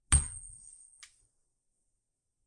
FDP - Coin Flip 2
Money, Sound, Flip, Toss, Video-Game, Effect, Coin, Short